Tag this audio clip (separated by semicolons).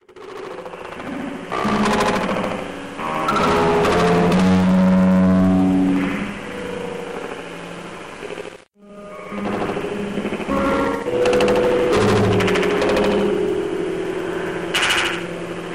guitar; lo-fi; loud; noise